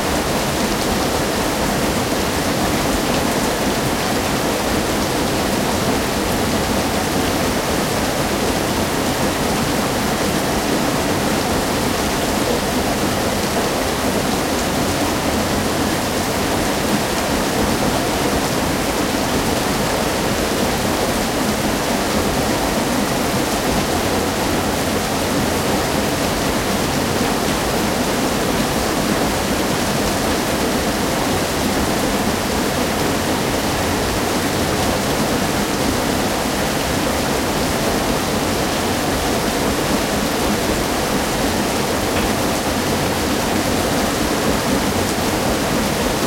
130921 GollmitzMill FrontAxle F
4ch-surround field-recording of an old watermill in the village of Gollmitz in Brandenburg/Germany. This clip is the front channels, mic'd at close range near the axle of the waterwheel, the mechanics of the wheel are heard, as well as excess water dripping down off the side of the wheel.
Recording conducted with a Zoom H2, mics set to 90° dispersion.
mechanic, watermill, surround, zoom, old, close-range, mill, atmo, Gollmitz, dripping, field-recording, water, nature, front, Brandenburg, H2, rushing, Germany